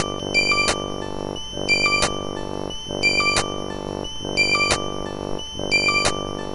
Another ambient loop with a percussive sound. Loopable @90bpm.